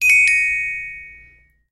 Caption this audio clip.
Xilofono siendo tocado